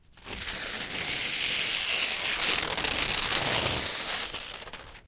rustle.paper Tear 7
recordings of various rustling sounds with a stereo Audio Technica 853A
scratch, paper, cruble, tear, rip, rustle, noise